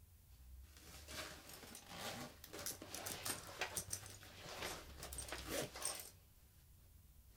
Opening Backpack
Opening a backpack with a zip
backpack, opening, zip